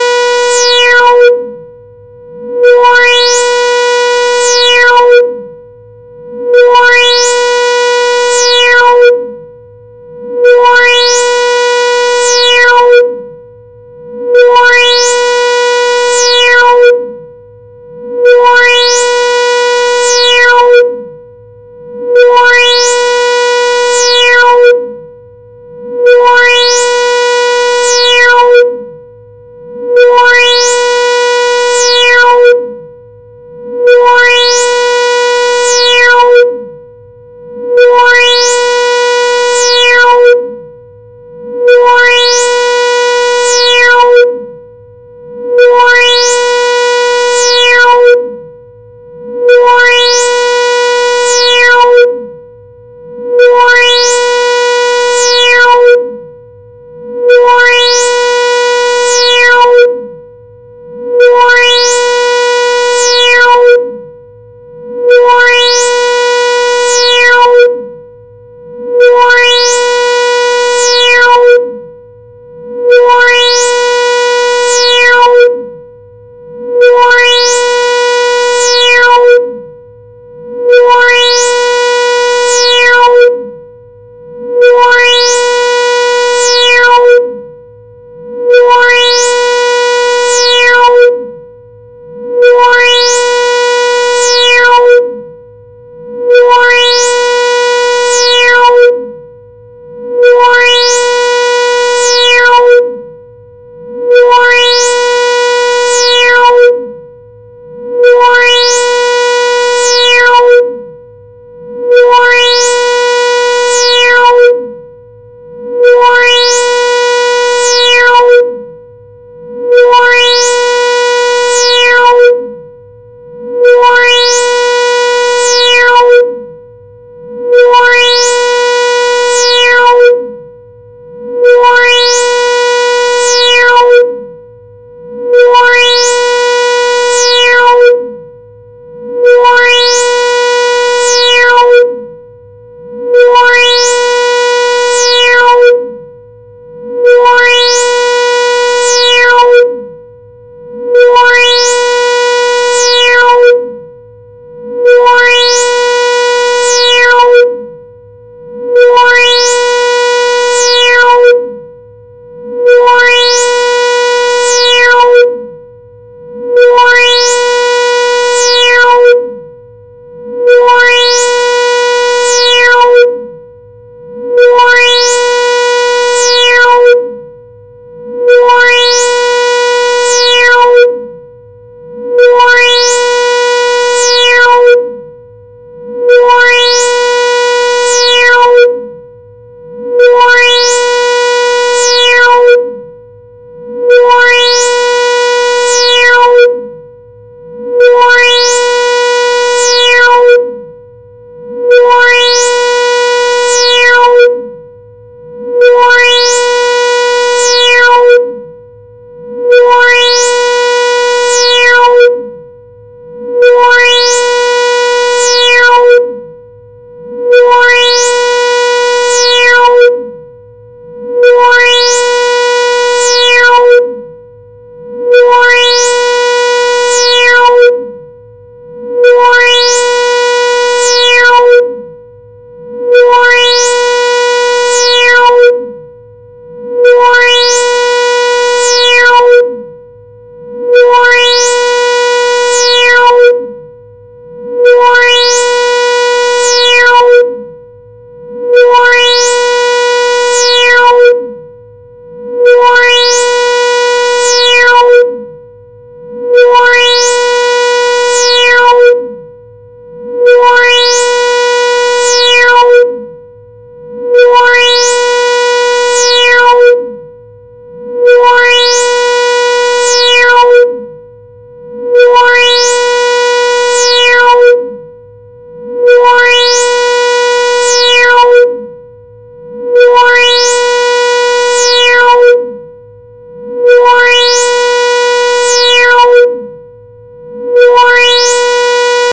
Itchy Ass Crack
I think I just didn't give a crap when I was naming this sound xD Its annoying, like that itch you just can't scratch!
bee, buzz, annoying, oscillating, fly